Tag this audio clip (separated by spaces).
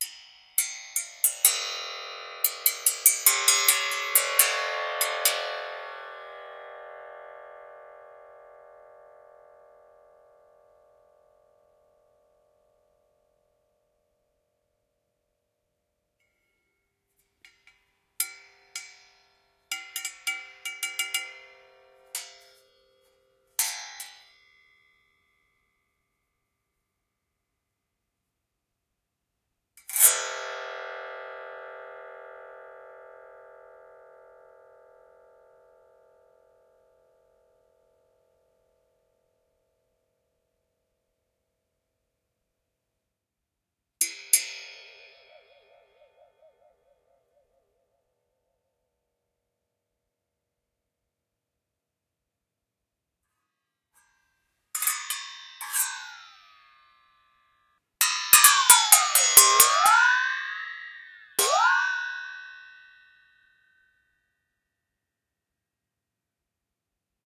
saw blade metal